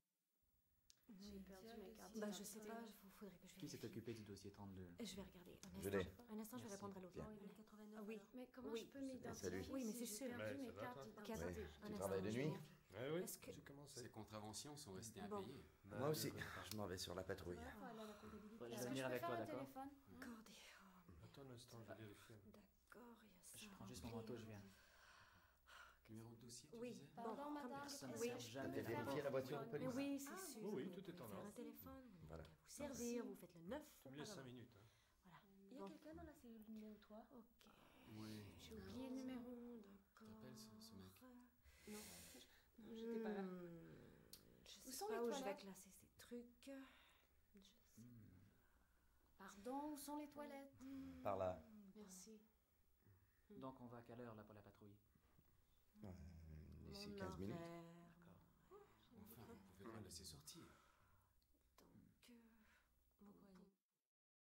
Interior vocal (French) ambiences: police precinct background chatter
interior, localization-assets, police-station, vocal-ambiences, walla